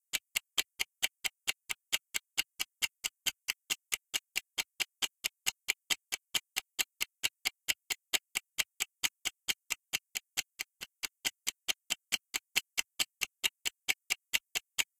Ticking Timer
If you enjoyed the sound, please STAR, COMMENT, SPREAD THE WORD!🗣 It really helps!